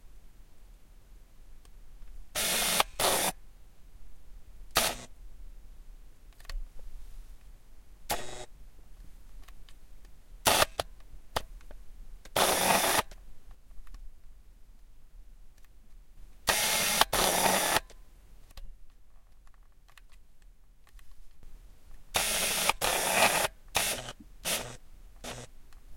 MrM OldAutoFocus Nikon2020
Sound of old and clunky auto focus from old Nikon 2020 camera. Edited with Audacity. Recorded on shock-mounted Zoom H1 mic, record level 62, autogain OFF, Gain low. Record location, inside a car in a single garage (great sound room).
foley, nikkor, nikon, 35mm, camera, old, vintage, motorwind, sound, servo, zoom, focus, h1, wind, 2020, auto, motordrive